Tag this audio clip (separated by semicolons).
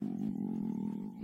tummy; foley; human